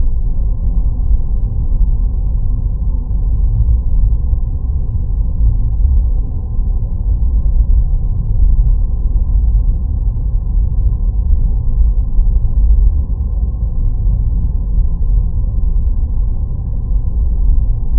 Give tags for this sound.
engine; background; vessel; noise; ambient; synthetic

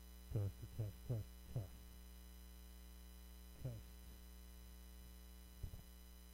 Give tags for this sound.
buzz; hum; noise; test; voice

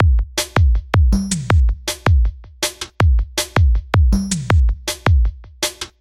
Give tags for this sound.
160bpm; loop

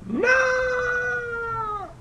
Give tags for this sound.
666moviescream; scream; no

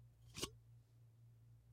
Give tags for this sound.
cap; martini; shaker; twisting

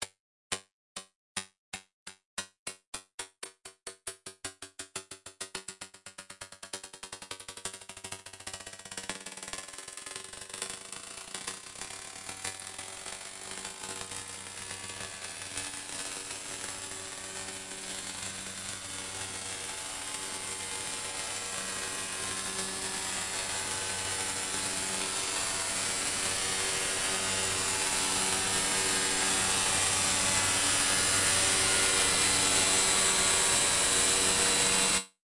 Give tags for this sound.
comb; grain; metal; resonance; waveshape

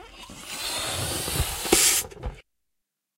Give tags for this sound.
balloon; inflate

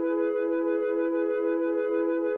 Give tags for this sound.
analog; chord; lfo; memorymoog; pad; synth; texture; tone; wave

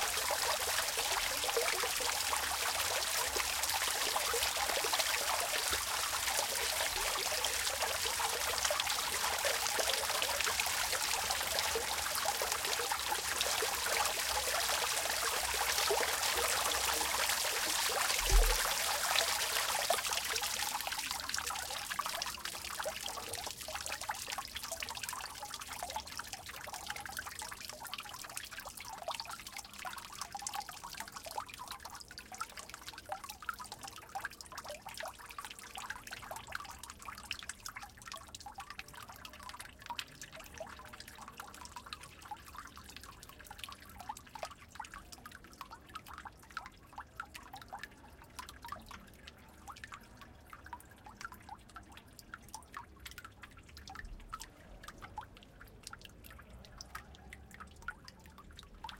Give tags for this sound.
ambiance; field-recording

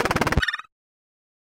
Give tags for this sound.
FX; Gameaudio; effects

